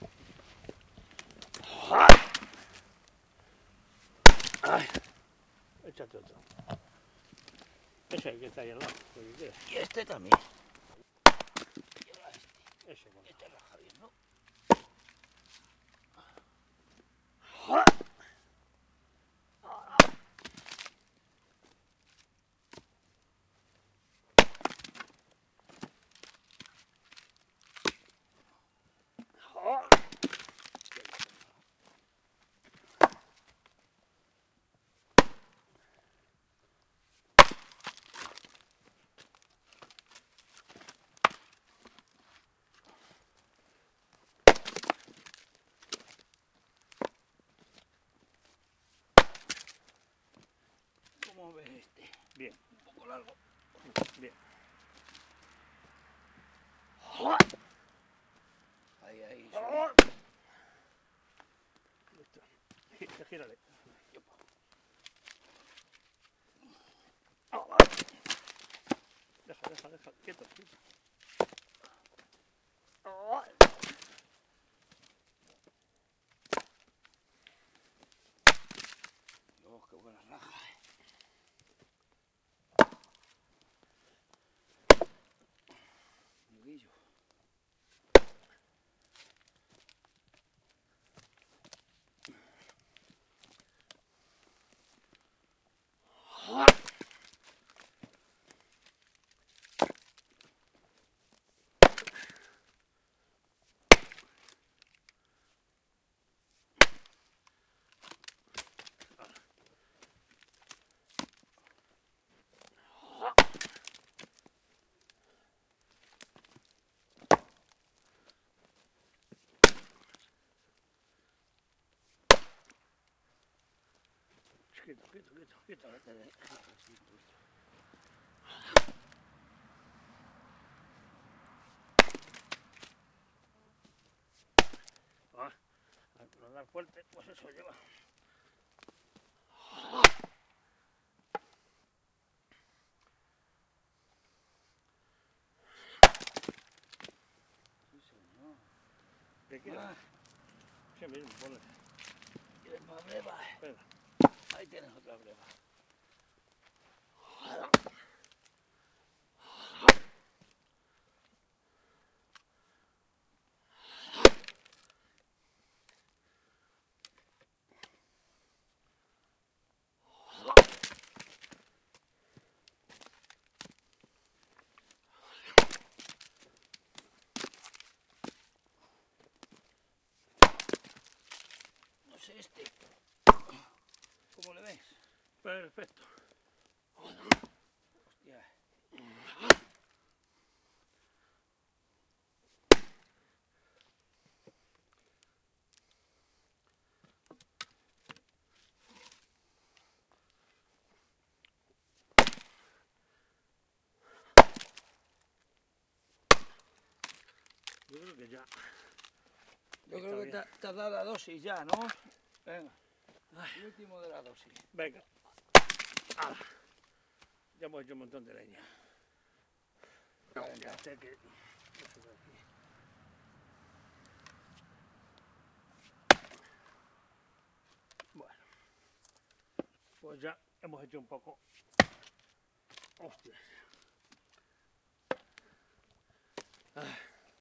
Leña :: Firewood
En el bosque rajando gruesos troncos de leña para calentar las casas.
Invierno del 2015.
In the forest splitting thick logs of wood to heat homes madera.
Winter 2015.